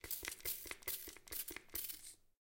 Vaporizer spraying
Few vaporizer sprays.
Bathroom, Field-recording, Spraying, Vaporizer